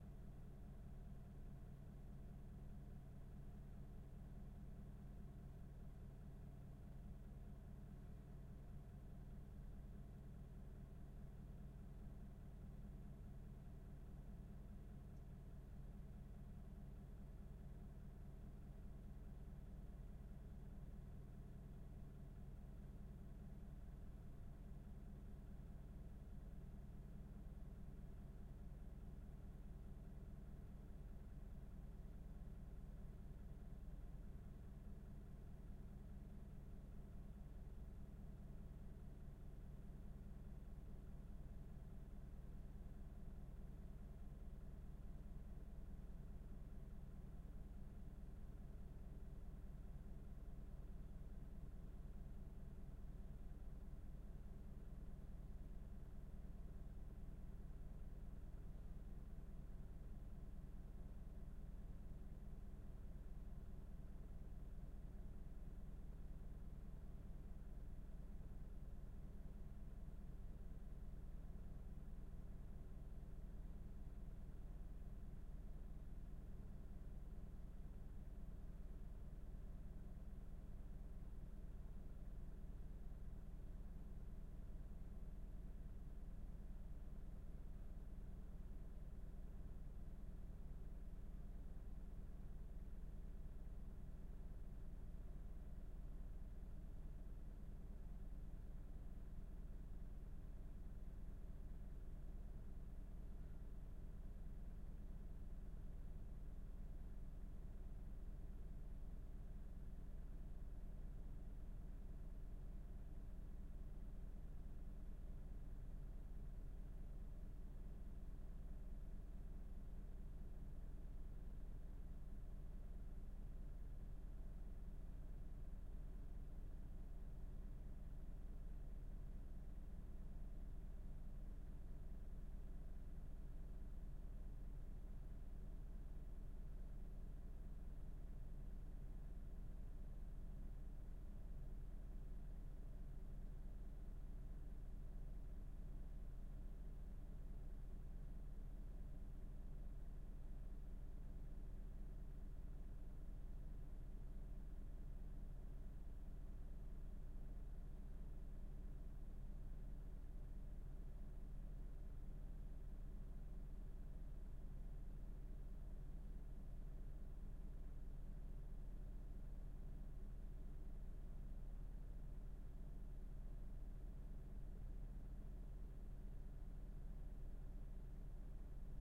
Car Ambience
This is the ambient sounds of the inside of a running car. Recorded with Zoom H6 Stereo Microphone. Recorded with Nvidia High Definition Audio Drivers.